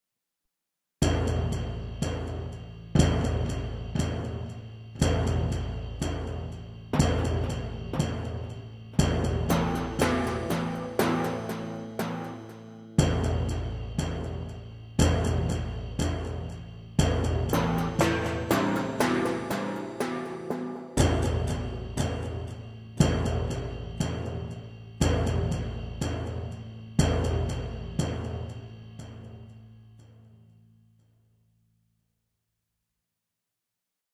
Recorded in stereo on a Boss BR-8. MIDI guitar played through a Roland GR-33 guitar synthesizer. Original sound design.
dark, ethnic, film, guitar, midi-guitar, percussion, synthsized